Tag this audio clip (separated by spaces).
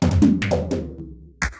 drum noise electronic loop 150-bpm beat electro music processed